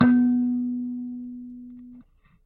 Tones from a small electric kalimba (thumb-piano) played with healthy distortion through a miniature amplifier.
amp, bleep, blip, bloop, contact-mic, electric, kalimba, mbira, piezo, thumb-piano, tines, tone